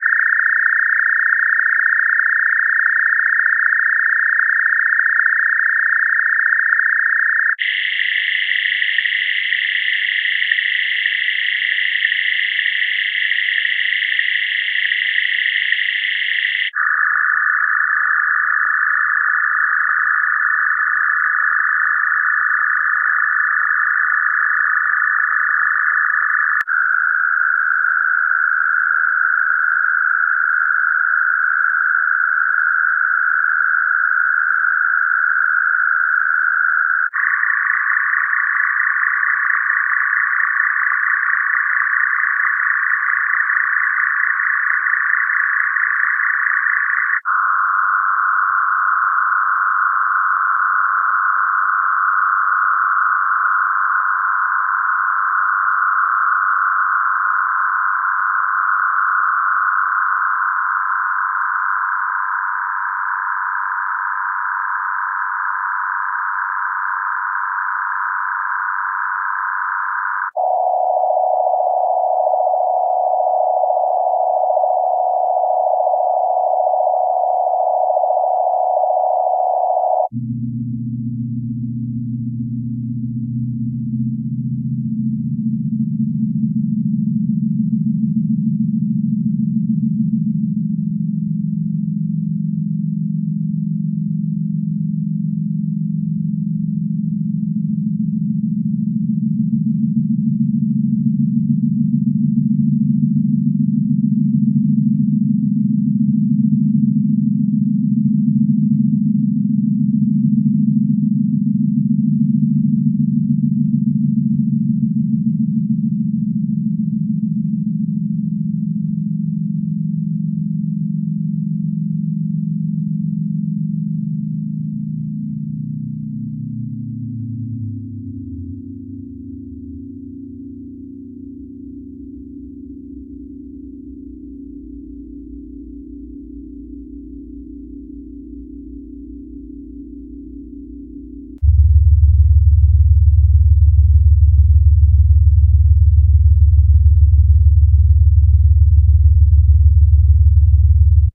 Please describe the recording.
Multiple sounds, some very different, all produced by the same Analog Box circuit. It was a final and closest (I think) attempt to recreate the Star Trek (original series) ship's phaser sound. By changing the frequencies of some of the oscillators (etc.) you can get very different sounding noises out of it. Interestingly, I think this sort of proves (to me, anyway) that multiple different sound effects from ST:TOS were done using the same mechanism, though perhaps with different filters and so on in addition to changing frequency settings. So this is a long-ish sampling from just one such circuit with some phaser-like sounds, some weird sci-fi mechanism sounds, a couple of which bearing some resemblance to the Star Trek astrogator sound, and some lower-pitched ship ambiance or engine types of sounds. When the pitch slowly changes, that is probably from me interacting with the control knobs during recording.
abox, mechanism, phaser, sci-fi, star-trek